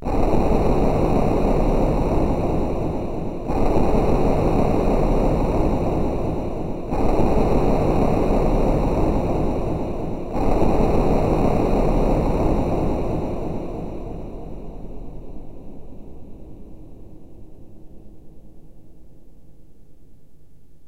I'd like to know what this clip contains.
OTHER dark nosie
Dark synth electronic ambient